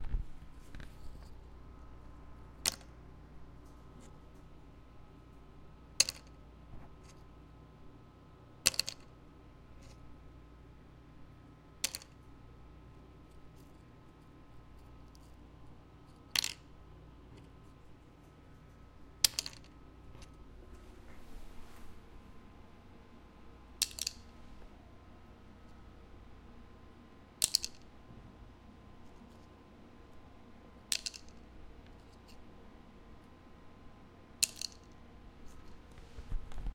cracker drop on table
click, cracker, drop